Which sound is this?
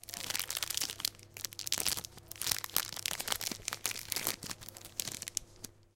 open paper Twix
You are hearing a movement of the paper from Twix chocolate when it is openned.
UPF-CS12, chocolate